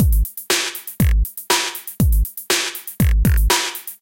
2 measures 120bpm 4/4